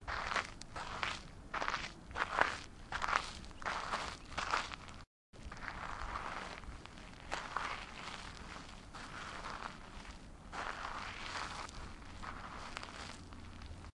A person with a pair of Adidas NMD's walked on a sandy playground in a park to execute the sound.
A Zoom H6 recorder was used, with the XY Capsule, recorded in a park.